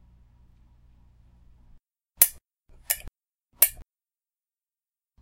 Desk Lamp
Desktop lamp being switched off and on. Recorded at home on Conexant Smart Audio with AT2020 mic, processed on Audacity. Noise Removal used.